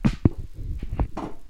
this sound is made using something in my kitchen, one way or another